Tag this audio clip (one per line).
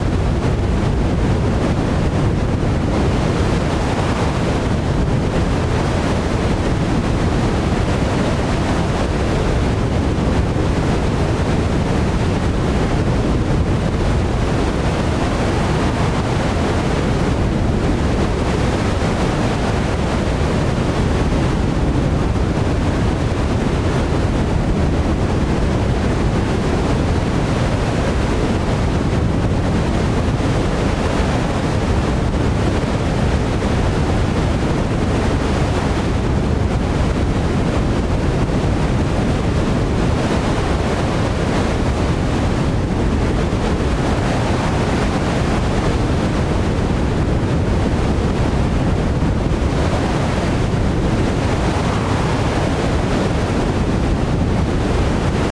forest
wind